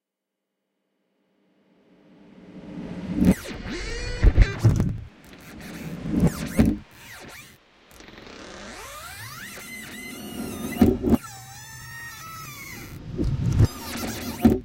Eerie sounding ghost scratching noise
Scratch, Creaking, Squeak, Reverse, Eerie